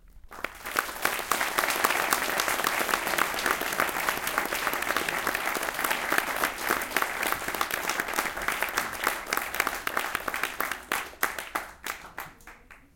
applause 13sec
Audience of about 150 people applauding in a cinema. Recorded on an Edirol R-09 with built-in mics.
theatre, applause, people, audience, theater